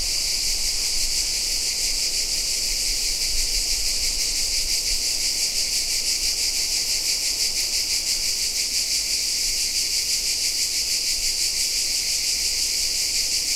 Italien - Sommertag - Toskana - Zikaden
An italian summerday with cicadas.
Italy, cicada, summerday, toscana